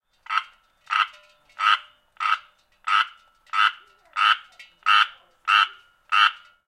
Florida Treefrog, Close, In Air Vent, 01
Audio of a Floridean treefrog that managed to find its way inside a metal air conditioning vent and began croaking an operatic aria for several hours.
An example of how you might credit is by putting this in the description/credits:
The sound was recorded using a "Zoom H6 (XY) recorder" on 2nd August 2018.
frog, croaking, florida